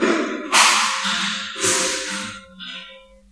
Clant-beat
Bonks, bashes and scrapes recorded in a hospital.
hit, hospital, percussion